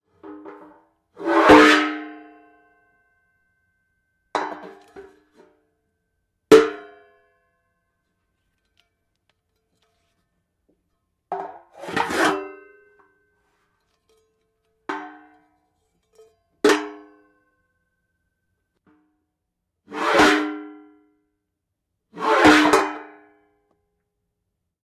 Pots and pans sliding and hitting off one another.